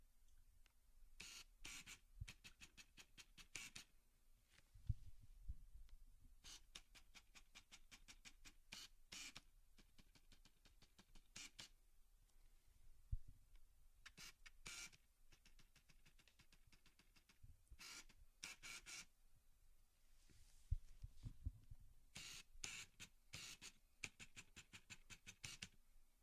Canon 60D Autofocus
A Canon 60D with an 18-135 ƒ/3.5 - 5.6 lens autofocusing.
camera, motor, dslr, autofocus, canon, picture